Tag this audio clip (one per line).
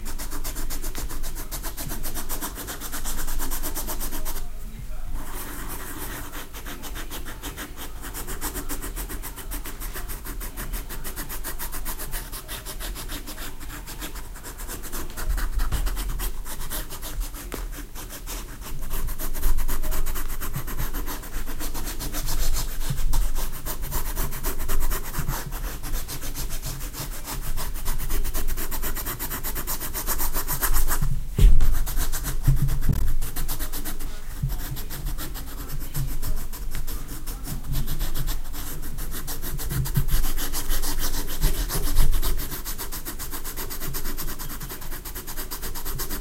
drawing
pen
writing